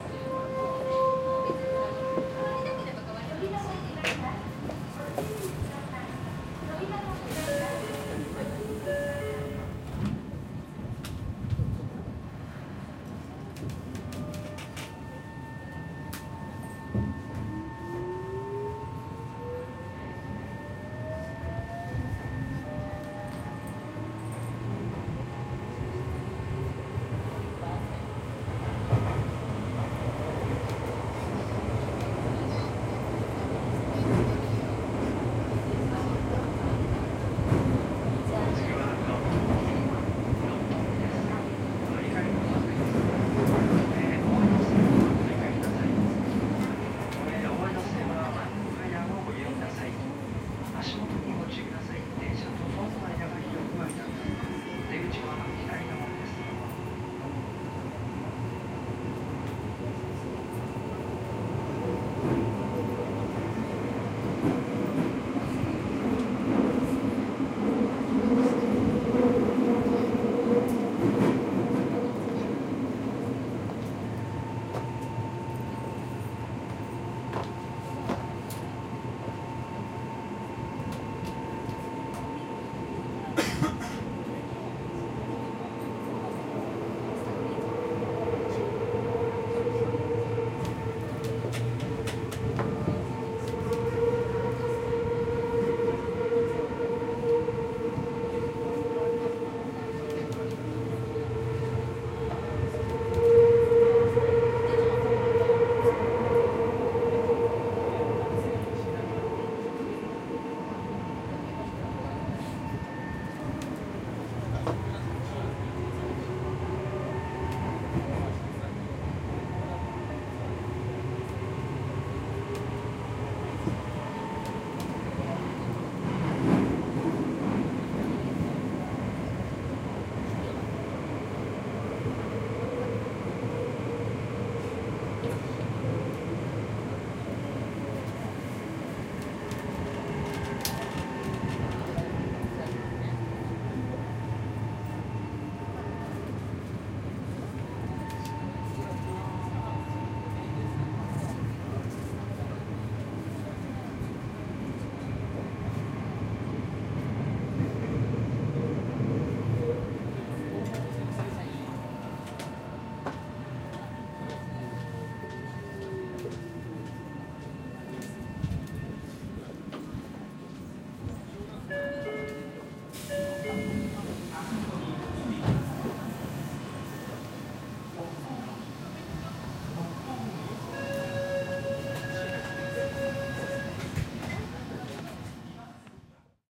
On the Hibiya line travelling from Hiroo to Roppongi on a Saturday afternoon. Subdued ambience. Recorded in May 2008 on a Zoom H4. Unprocessed apart from a low frequency cut.